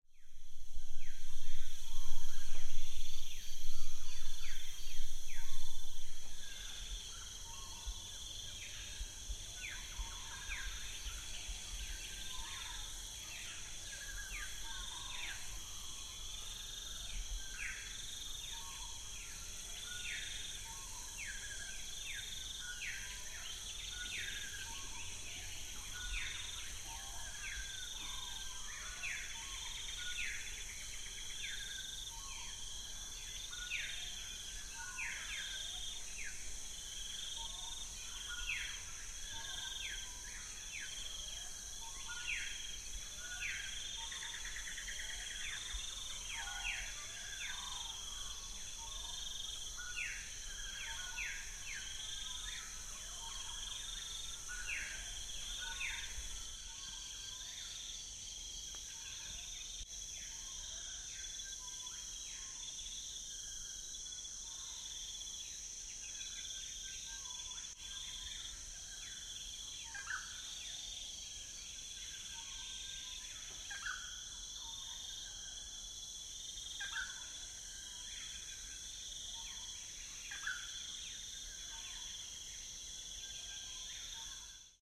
20181201 early morning
Early morning in summer. Forest birdsong, crickets and cicadas. South-eastern Queensland
bird, birds, birdsong, cicadas, field-recording, forest